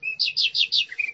Bird - Pajaro 1
Bird singing at night.
bird, birds, singing